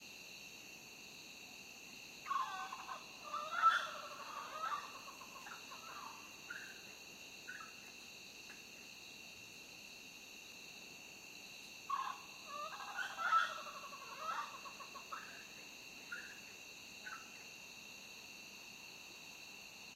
Flying Fox 2
A colony of Flying Foxes jostle for position one night, in the trees at Palm Cove (Queensland, Australia). Pteropus conspicillatus also known as the Spectacled Fruit Bat, lives in Australia's north-eastern west regions of Queensland. It is also found in New Guinea and nearby islands. Recording chain: Panasonic WM61-A home made binaural mics - Edirol R09HR recorder.